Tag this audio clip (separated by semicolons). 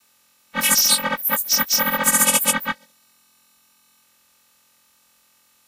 retro; signal; old; processing; data